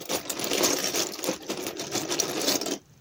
LEGO Rustle v001
Moving my hand slowly through the lego. To give the effect of lots of bricks settling
debri, Lego, rustling